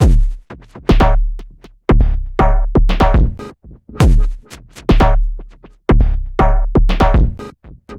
Massive Loop -41
An experimental loop with a minimal touch created with Massive within Reaktor from Native Instruments. Mastered with several plugins within Wavelab.
minimal, 120bpm, loop, experimental